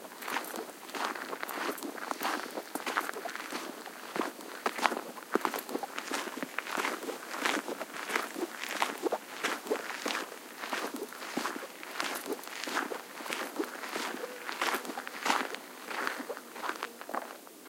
sound of footsteps on gravel. RodeNT4>iRiverH120(Rockbox)/ pasos sobre grava